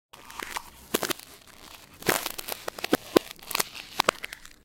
Ice 5 - reverse
Derived From a Wildtrack whilst recording some ambiences
snow, freeze, walk, frost, frozen, footstep, field-recording, foot, winter, crack, BREAK, cold, effect, step, sound, ice